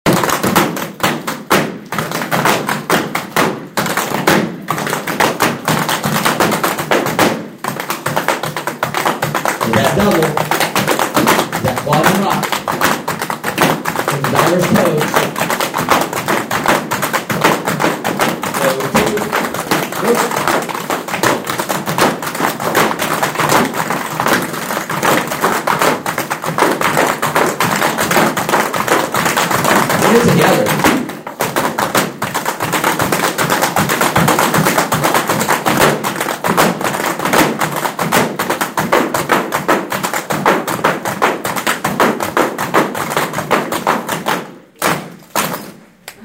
Cloggers clogging in Lincoln, Nebraska
The sound of cloggers performing in Lincoln, Nebraska on January 27, 2017.
cloggers, clogging, dance, Lincoln-Nebraska, tap-dancing